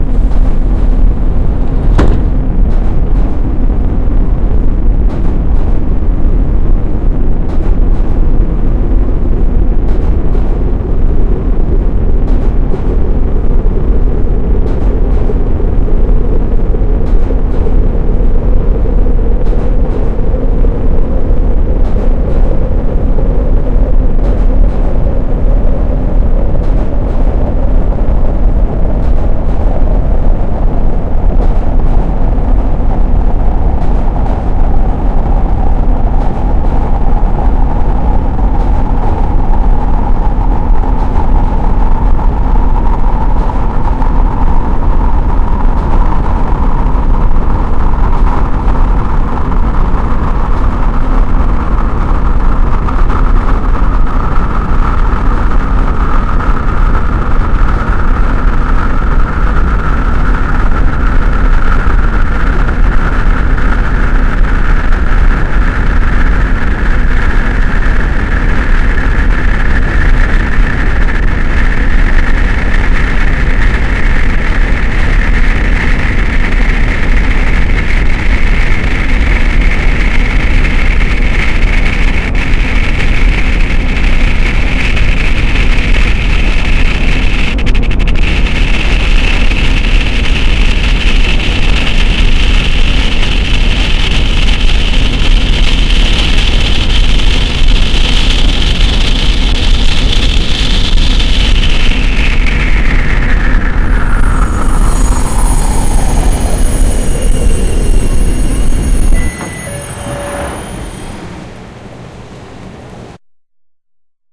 Noisemetro (Long)
The sample are mixed and processed. The fade-in/stopping done by filters & the noises, the full sample done by glitching, granulize & adding more sounds: Doorclosing, drive starting, -stopping & dooropening/drive ending.
avenue, glitchy, harsh-noise, long, longer, metro, metroline, noise, noisemetro, subway, syntheish, under-avenue, underground